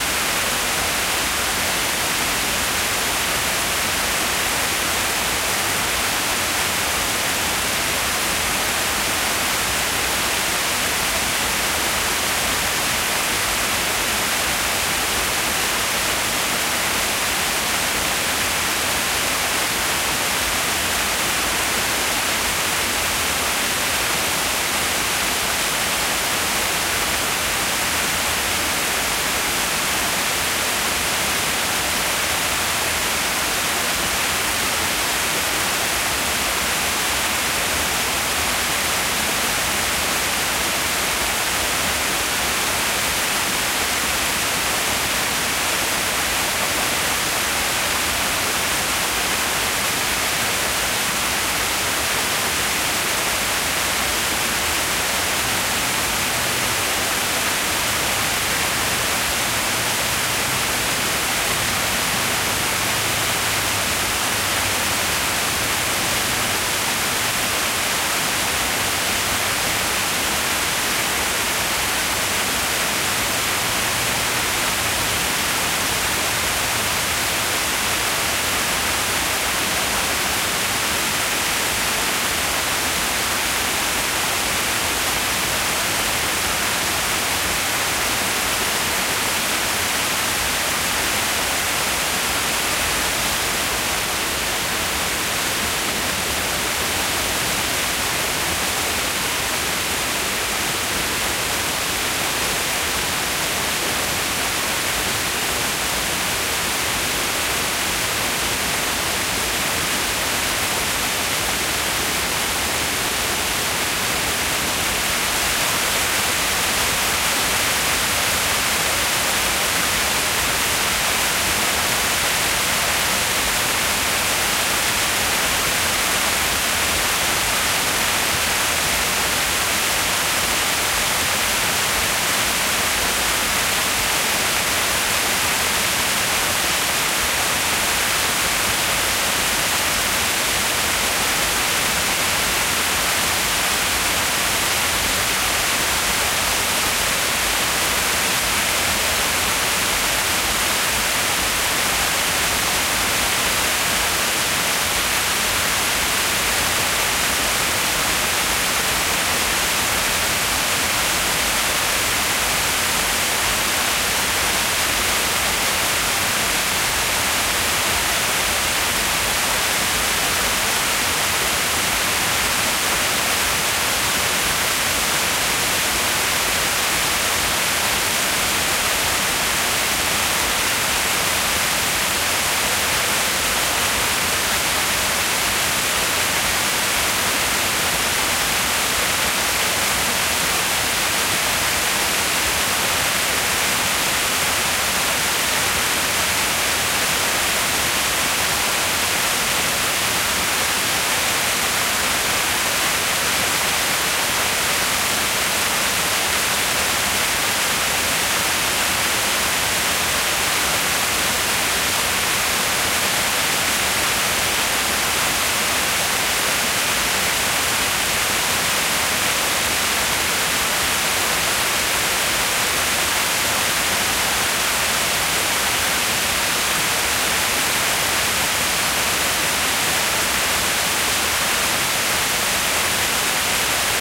Sound of a fountain in a square. The fountain was recorded in Larissa, Greece.
If you want, you can always buy me a coffee. Thanks!